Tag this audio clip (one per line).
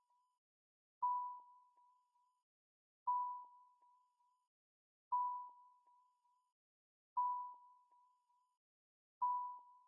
WALKING
Walk-D
DELICE